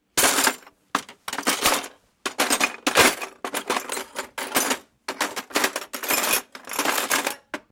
mixing glass pieces